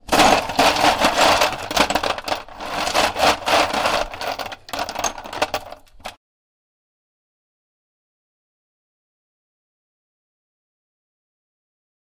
Ice cubes being mixed around in a bowl.
cold, cubes, frozen, ice, water